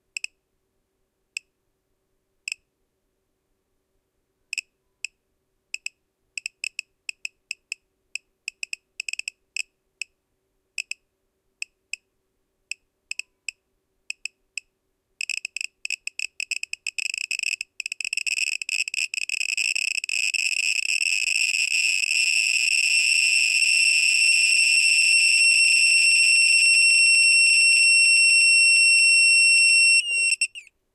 Geiger Counter Radioactive
This was a happy accient. I was charging a drone battery though an adapter with overvoltage protection, which started beeping and increasing in frequency as the battery got close to full charge.
There is a good bit of sound at the end, when I pulled off the battery and the capacitors in the charger discharged.
Have fun with it! Cheers!
Recorded on Zoom F1 with XYH-5.
Power, Geiger-Counter, Radiating, Apocalypse, Ionizing, Counter, Radioactive, Geiger, Hazard, Radiation, Nuclear